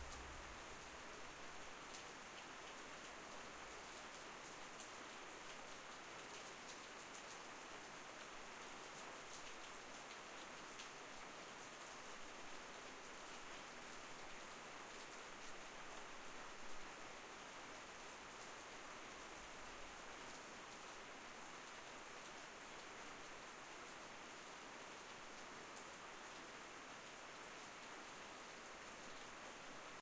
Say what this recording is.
Rain in the Costa Rican rain forest captured at night